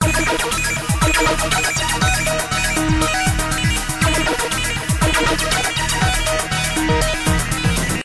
BAS-23022014 3 - Game Loop 4
Game Loops 1
You may use these loops freely if
you think they're usefull.
I made them in Nanostudio with the Eden's synths
(Loops also are very easy to make in nanostudio (=Freeware!))
I edited the mixdown afterwards with oceanaudio,
;normalise effect for maximum DB.
If you want to use them for any production or whatever
23-02-2014
game, music, sound